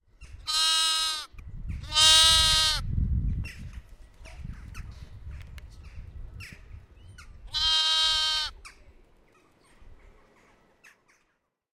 baby lamb calling his mother2
Baby lamb calling his mother. Recorded with a zoom H1n in a City Farm in The Hague.
Morning, 12-03-15.